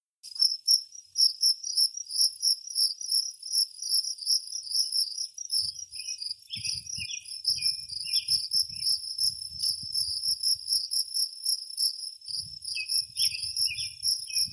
Morning crickets and bird
nature ambiance with a steady cricket chirp and one bird making interjections.
ambiance, birds, crickets, field-recording, insects, nature